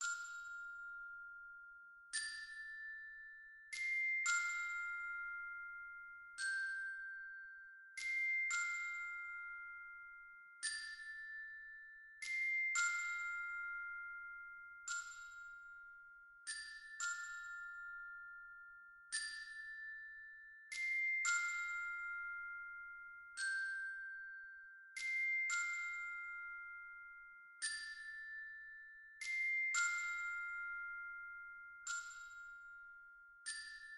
A simple clockwork i created for a short movie!
i used a FM bell and some EQ to make it tiny :)
Im hoping you could use this in you movies aswel.